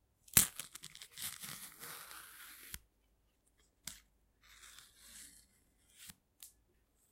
banana opening
Closeup recording of the opening of a banana (unfortunatly with some neighbours making sound as well)
banana, closeup, opening, recording, slicing